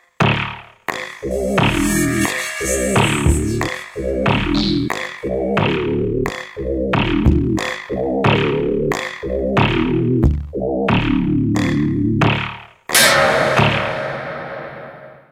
Robotic loop
a little loop, with synth drums and bass, made on ableton 5
drums, loop, synth